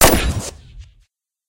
pulse rifle 1
more of my lazer gun collection I made using fl studio. Trust me ....You're gonna WANT these ;)
sci-fi, weapons